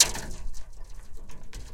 Somewhere in the fields in belgium we found a big container with a layer of ice. We broke the ice and recorded the cracking sounds. This is one of a pack of isolated crack sounds, very percussive in nature.